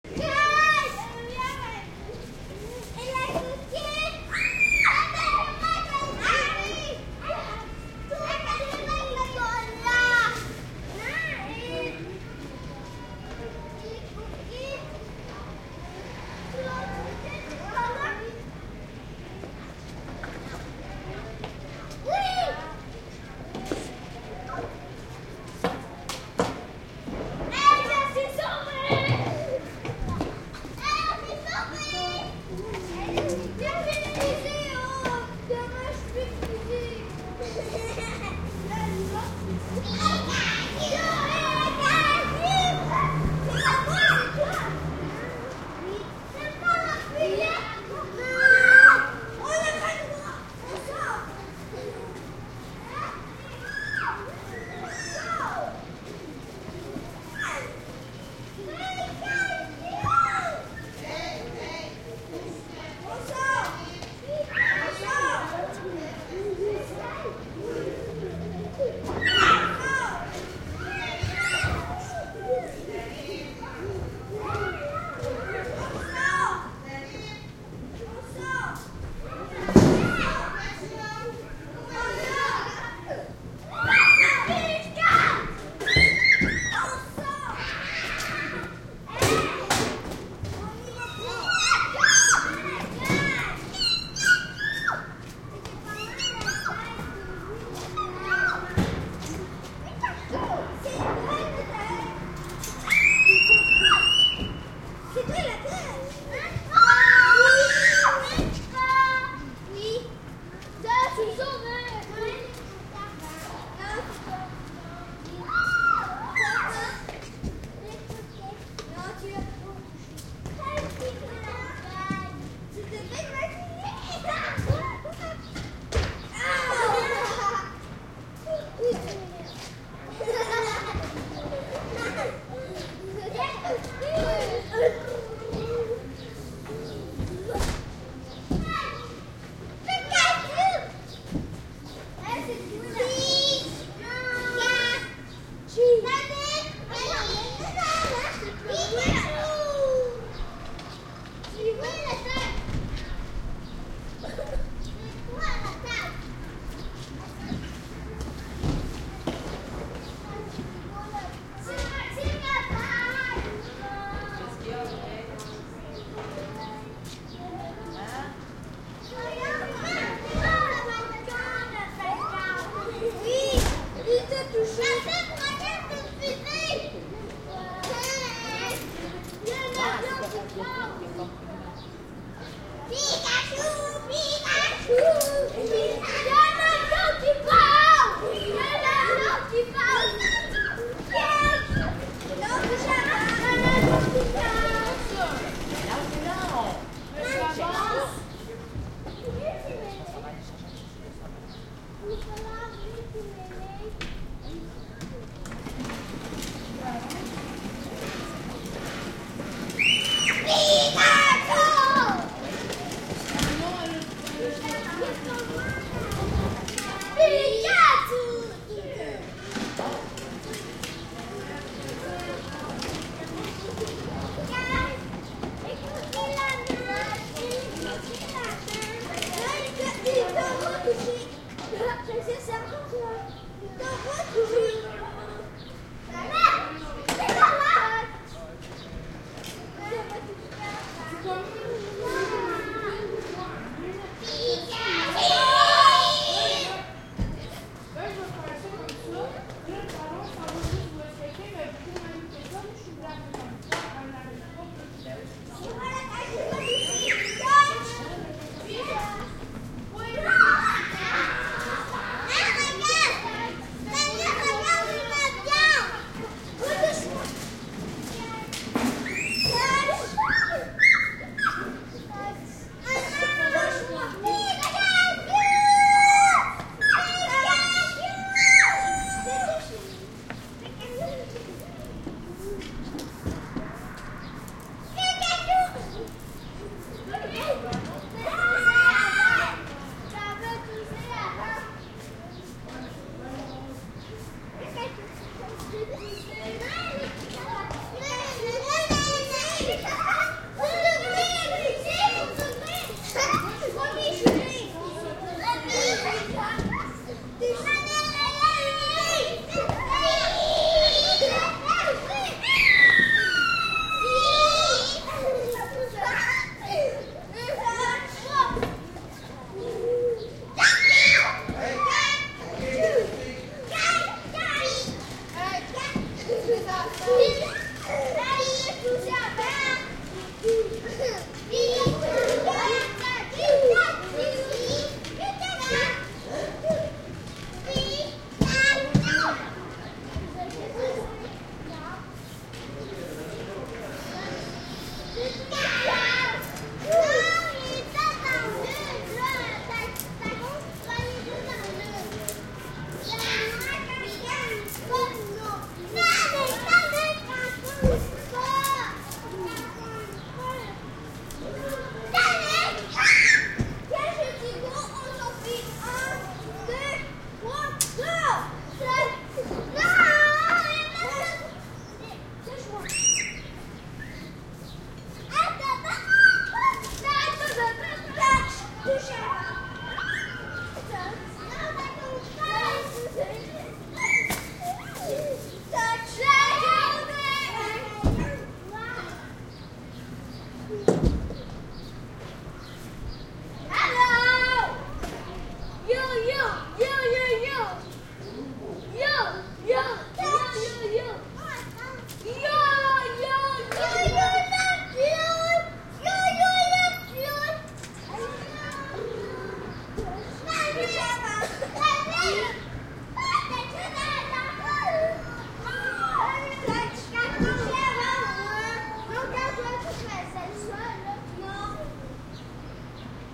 kids quebecois playing shouting backyard alley from balcony1 Verdun, Montreal, Canada
alley, backyard, balcony, Canada, from, kids, Montreal, playing, quebecois, shouting, Verdun